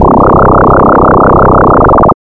SFX suitable for vintage Sci Fi stuff.
Based on frequency modulation.
engine, flight, scifi, ship, synth, vintage